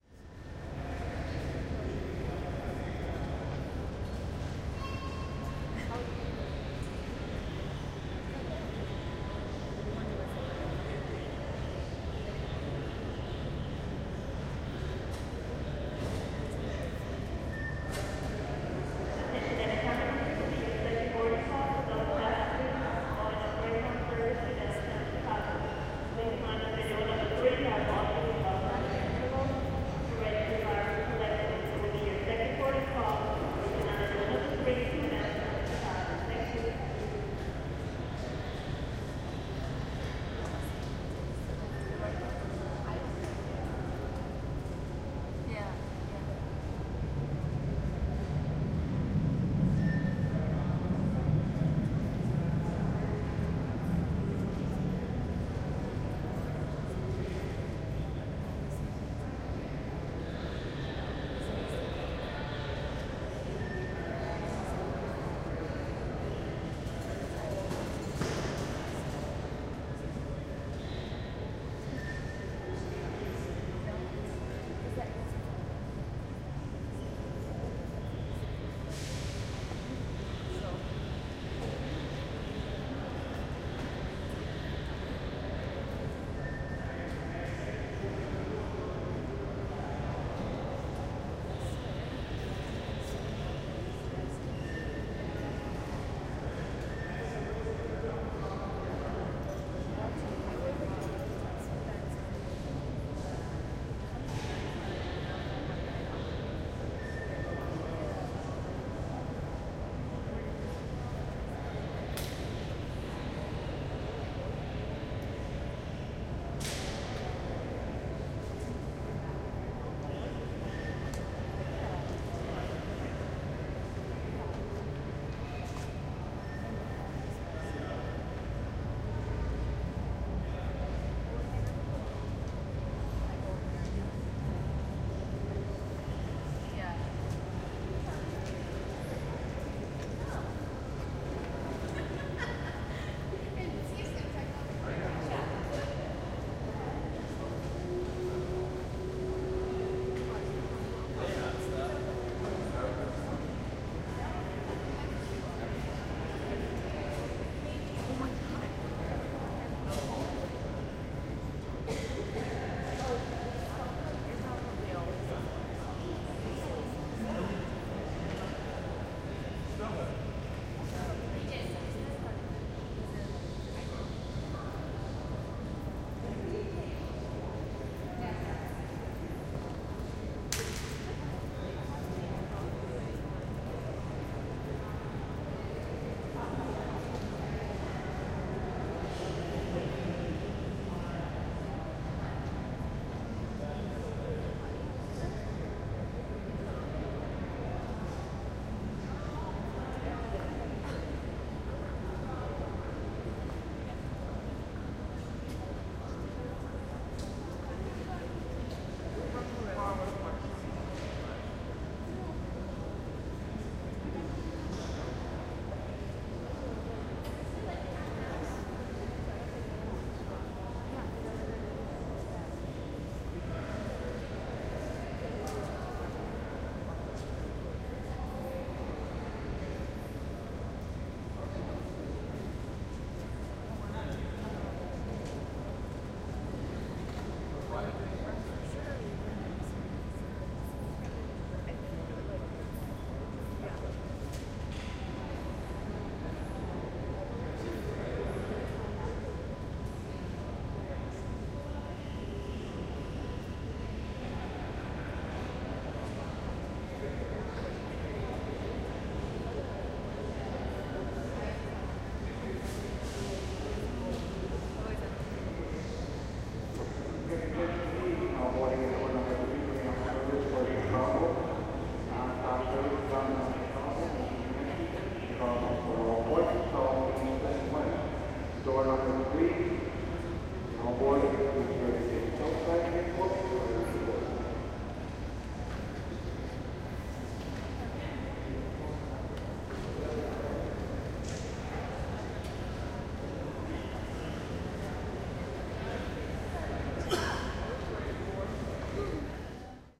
Ambient sounds recorded in the main waiting area of the Milwaukee Amtrak station, July 25 2009. Contains two announcements made over the PA system. Recorded using a Zoom H2.